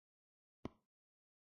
Sound of a single finger tip on a touch screen. Recorded with H2n, optimised with Adobe Audition CS6. Make sure to check the other sounds of this pack, if you need a variety of touch sreen sounds, for example if you need to design the audio for a phone number being dialed on a smartphone.
screen, touch, touch-screen, touchscreen